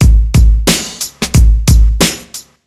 heavy downtempo Drum loop created by me, Number at end indicates tempo